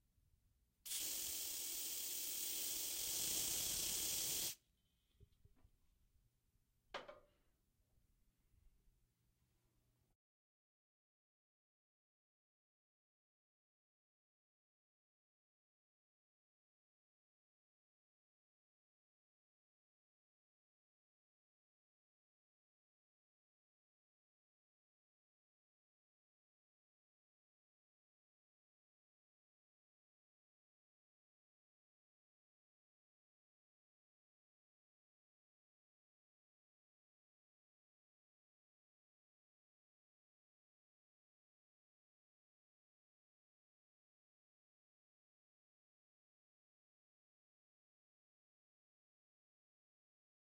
untitled spray
can, field-recording, spray